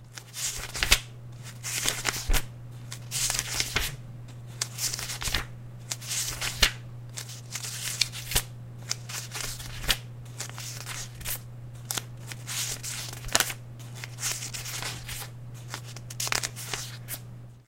Page turn
Turning the pages of a book.